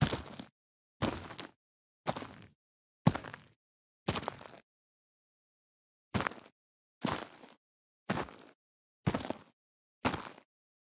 Footsteps Boots Gritty Ground Stones Leaves Mono
Footsteps sequence on Gritty Ground (stones and leaves) - Boots - Walk (x5) // Run (x5).
Gear : Tascam DR-05
step, ground, recording, stones, stone, leaf, running, walking, foot, steps, dirty, leaves, tascam, run, field